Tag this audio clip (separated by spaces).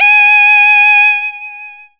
saw reaktor multisample